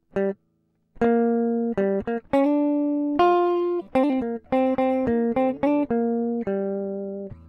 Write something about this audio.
guitar melody
acid, apstract, funk, fusion, groovie, guitar, jazz, jazzy, licks, lines, pattern